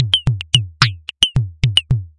A rhythmic loop created with an ensemble from the Reaktor
User Library. This loop has a nice electro feel and the typical higher
frequency bell like content of frequency modulation. A nice minimal
electronic loop. The tempo is 110 bpm and it lasts 1 measure 4/4. Mastered within Cubase SX and Wavelab using several plugins.